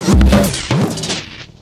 Wobbly pitch-warped tom roll.Taken from a live processing of a drum solo using the Boss DM-300 analog Delay Machine.
drum, lofi, analog, glitch, warped